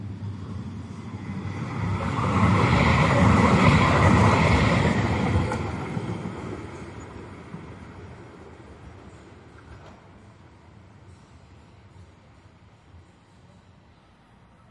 Debrecen Tram pass by CsG
pass, transport